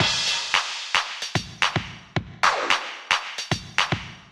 Filter Loop 002
drum-loop, electro, groovy, minimal, percs, percussion-loop